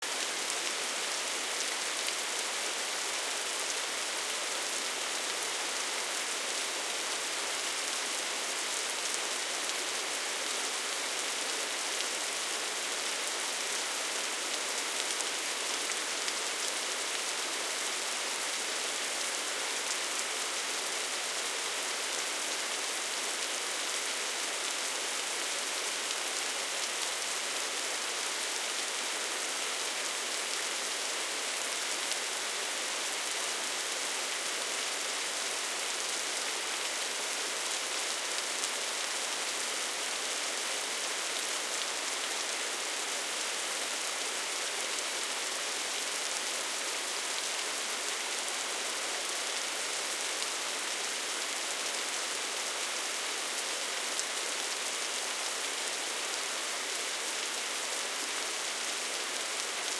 Ambiance - Heavy Rain Loop
This is a minute long loop of various rain sounds layered together to create a large, widely panned heavy rain effect.